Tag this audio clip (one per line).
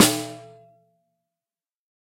1-shot
drum
multisample
snare
velocity